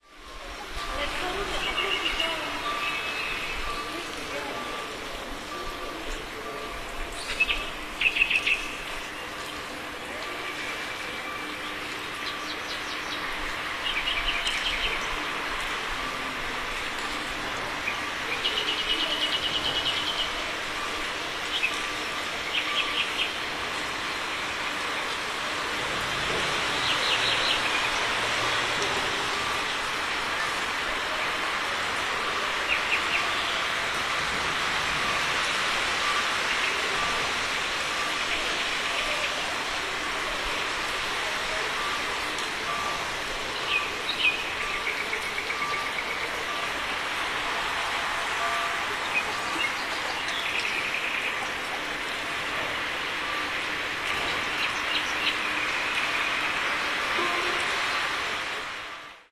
under bridge 300510
30.05.2010: 21.00. Under the Przemysl I bridge in the city of Poznan where I was watching overfilled the Warta river because of the main flood wave. The sound reverbed a little bit.
There are audible: dripping deafen by passing by cars.
bridge, drip, birds-singing, under-the-bridge, water, poland, flood, field-recording, church-bells, traffic-noise, cars, warta-river, dripping, poznan, people